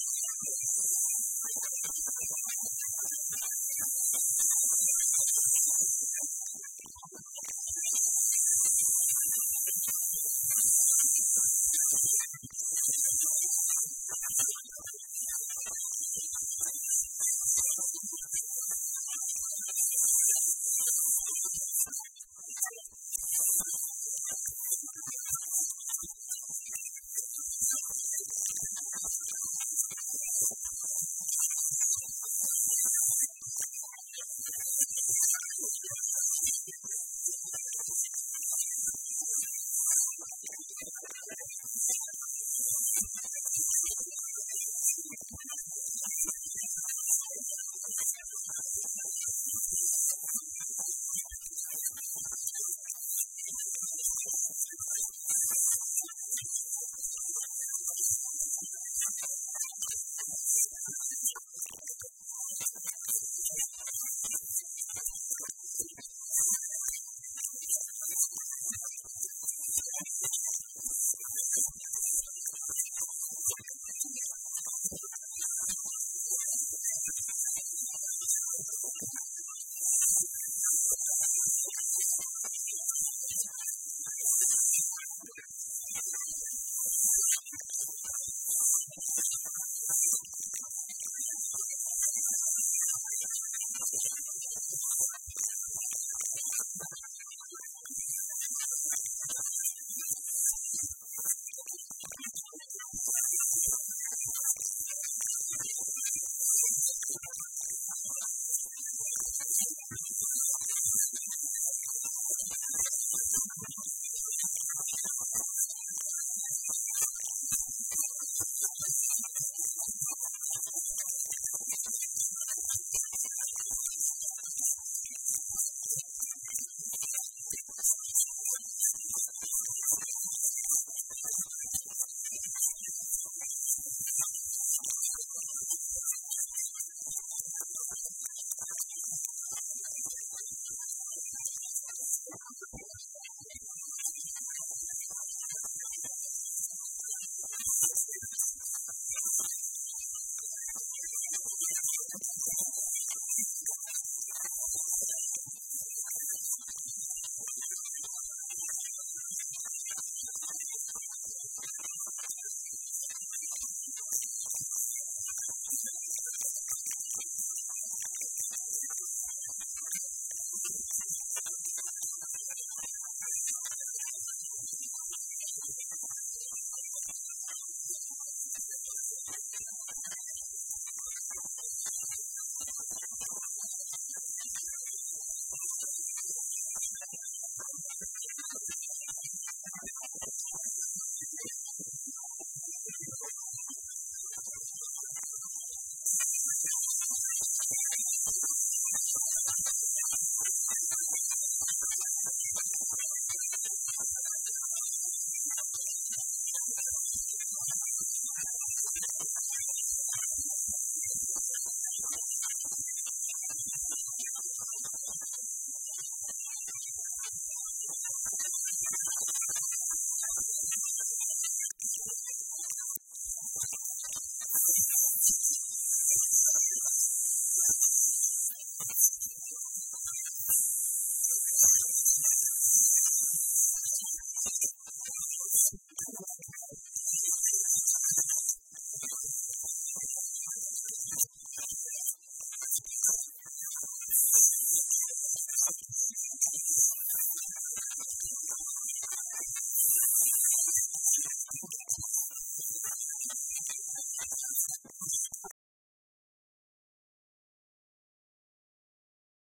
Radio Scanning Channels 1
Scanning channels on a household radio
Fm, Radio, Am, Scanning